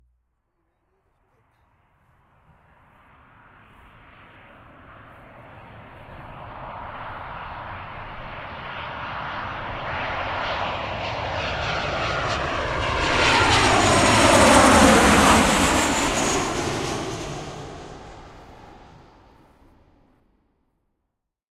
Landing Jet 1
Civil airliner landing
field-recording, ambiance, aircraft